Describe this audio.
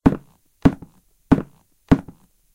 walking on a floor
floor, footsteps, walk, shoes, footstep